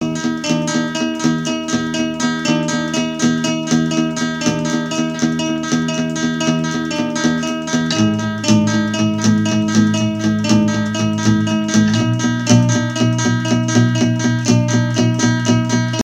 DOHF Guitar
A collection of samples/loops intended for personal and commercial music production. All compositions where written and performed by Chris S. Bacon on Home Sick Recordings. Take things, shake things, make things.
acapella, acoustic-guitar, bass, beat, drum-beat, drums, Folk, free, guitar, harmony, indie, Indie-folk, loop, looping, loops, melody, original-music, percussion, piano, rock, samples, sounds, synth, vocal-loops, voice, whistle